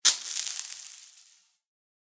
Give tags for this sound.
agaxly; crumble; dirt; dust; gravel; litter; scatter

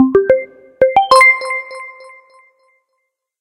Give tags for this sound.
attention; chime; sound

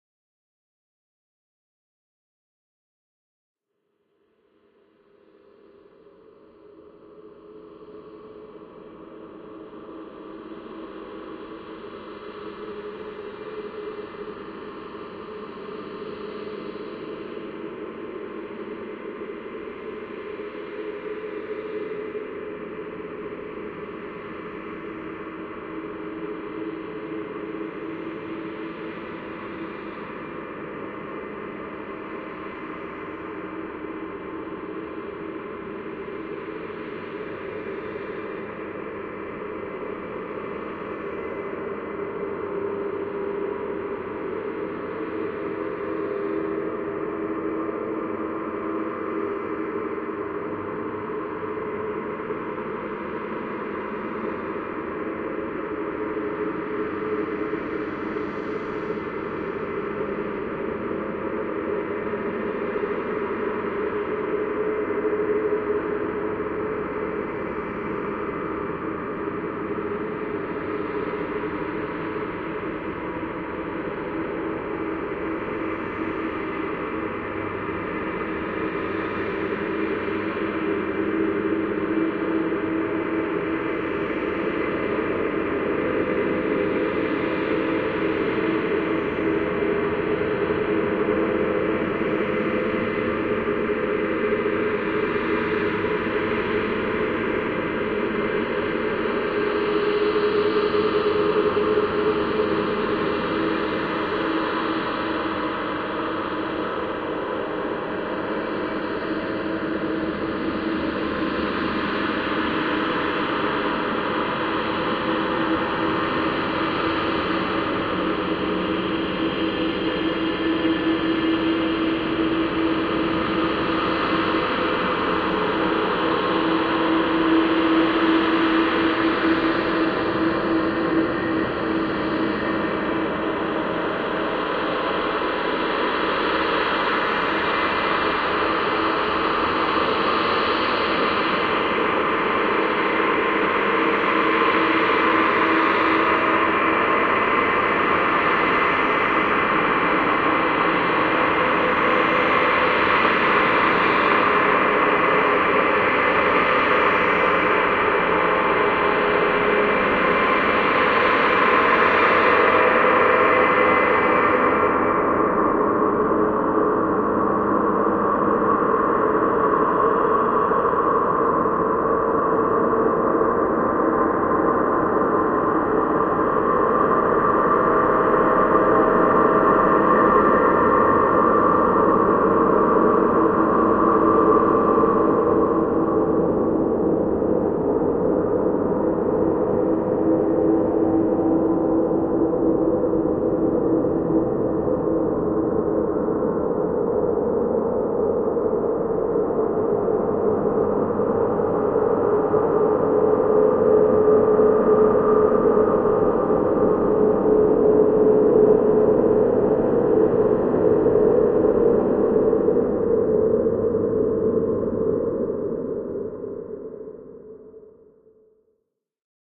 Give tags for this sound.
ambient; Continuum-1; creepy; dare; dark; remix; space; texture

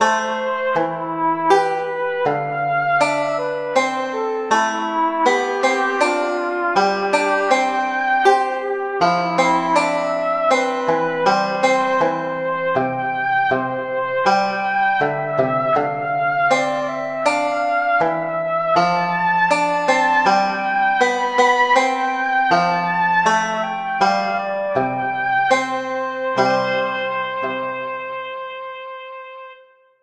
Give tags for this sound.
medieval; village; music; folk; farm

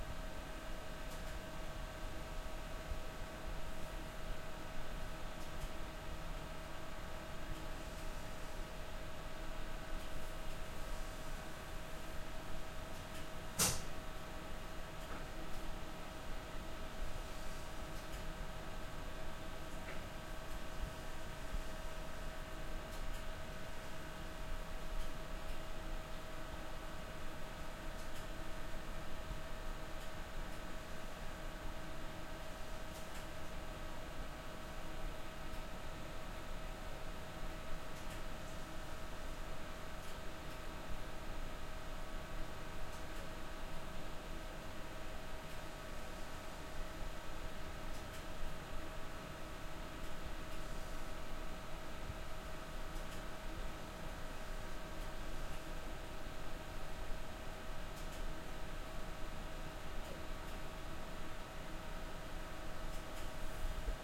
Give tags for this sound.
machine; Lofoten; system; machinery; field-recording; tubes; room; mechanical; machines; furnace; industrial; amb; indoors; Norway; g; boiling; basement; Kabelv; ambience; ventilation; furnace-room; Norge; noise

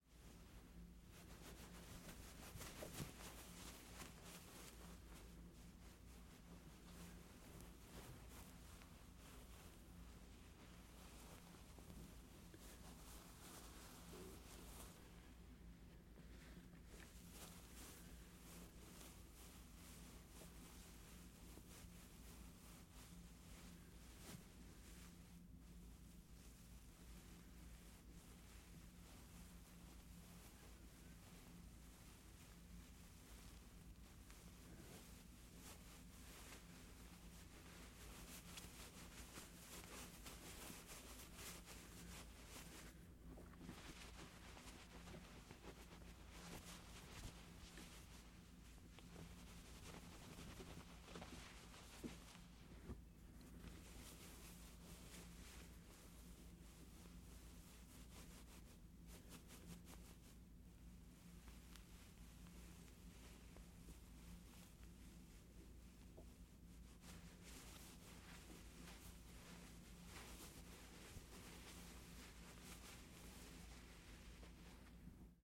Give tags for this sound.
cloth; clothes; clothing; fabric; material; passes; slide; swish

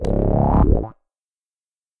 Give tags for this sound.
FX; Gameaudio; SFX; Sounds; effects; indiegame; sound-desing

